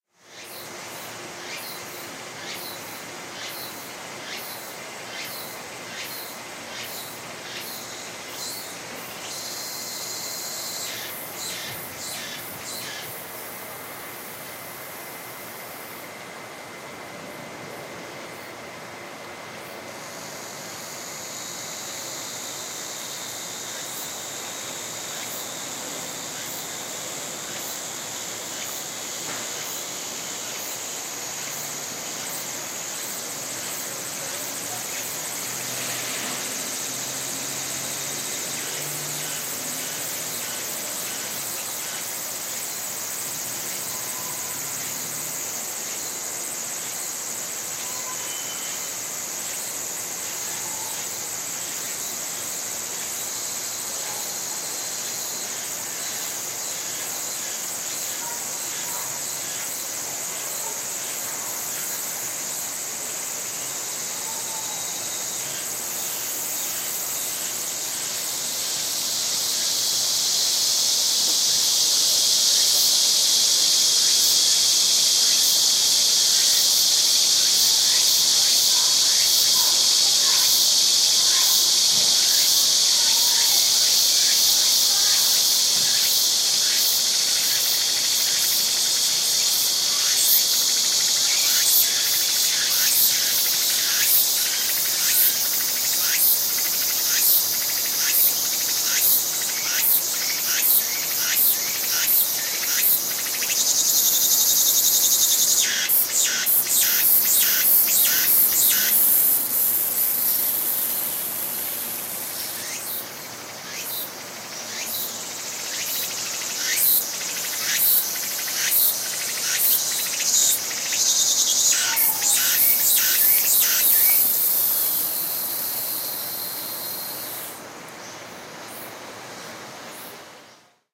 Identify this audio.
cicadas at park
A binaural recording of several cicadas chirping away. Recorded in a small park near my apartment in Anyang, South Korea. Slightly filtered with a high-pass to remove low frequency rumbling from wind noise.
binaural, cicada, field-recording, insect, korea, summer